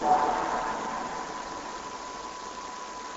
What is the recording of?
Other than cutting, slicing-- no effects were applied. A resonant machine sound.
Effect, Effects, Machine, Machinery, Mechanical, Slice, ToiletTrollTube